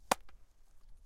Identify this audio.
Glove Catch 7 FF008
1 quick, glove catch. high pitch, distant, soft/medium smack.
glove-catch, ball